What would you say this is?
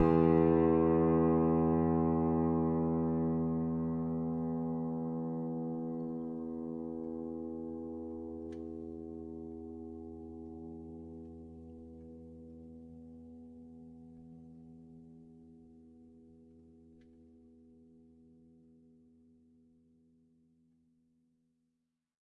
a multisample pack of piano strings played with a finger
fingered, multi, piano, strings